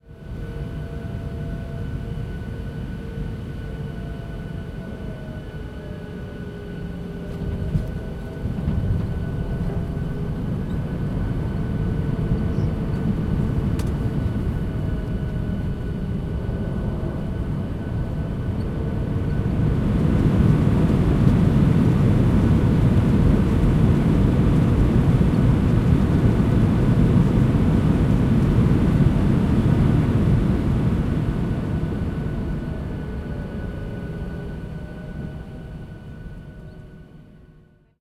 Commercial plane landing ambience